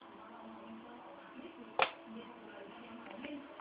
This sound is recorded with my phone, it's me pressing a big switch that don't work in my room... This sound have bad quality... sorry :S